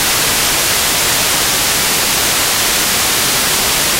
Korg MonoPoly Noise
Just plain noise. Use this to modulate analog gear or similar.
korg, monopoly, noise